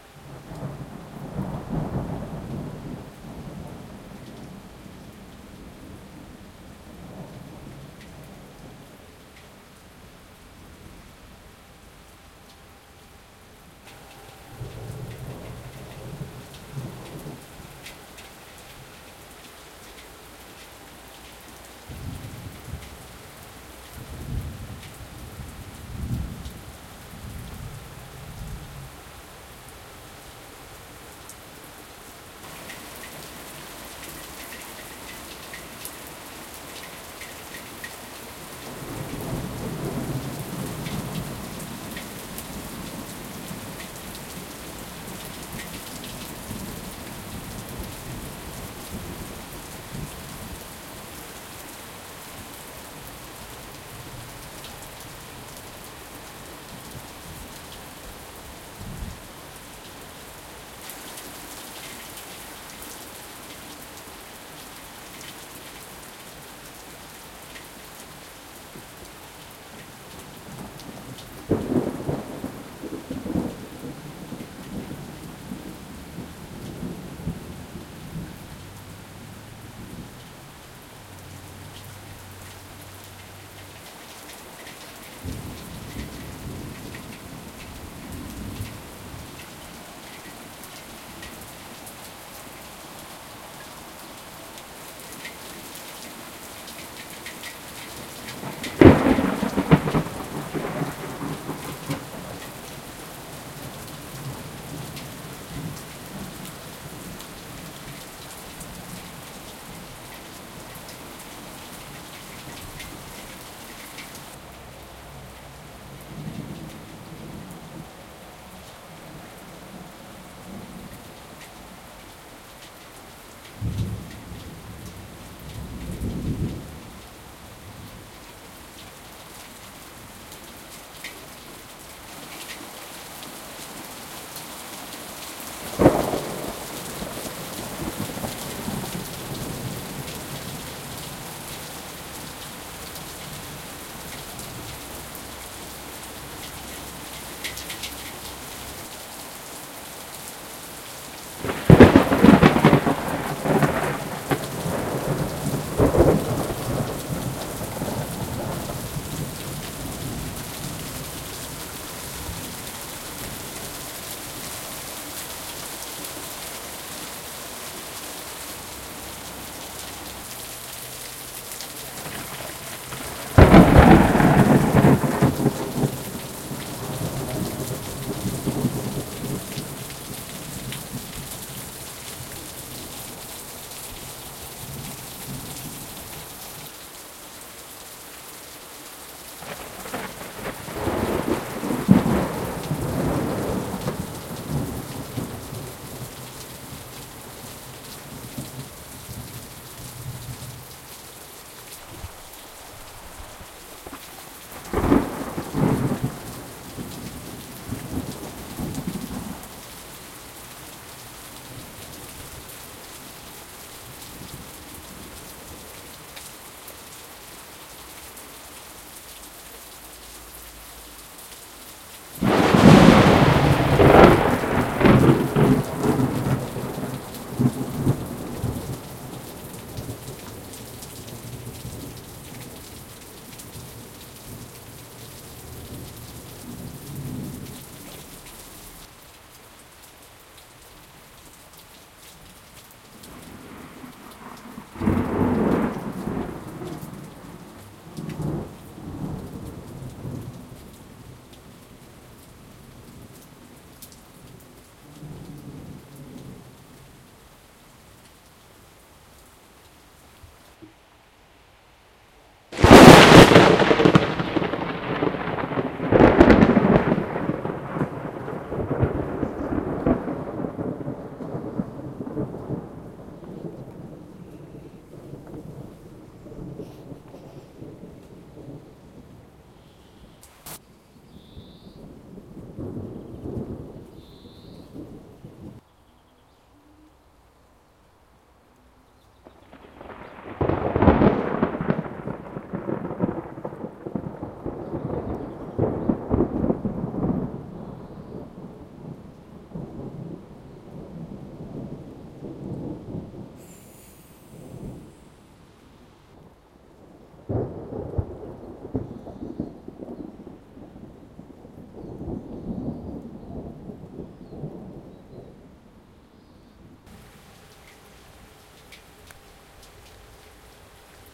Thunder and rain, thunder far and nearer - ukkossade, ukkonen kauempana ja lahempana
field-recording, ukkonen, thunder, nature, sade, ei-prosessoitu, not-processed, editoitu, edited, rain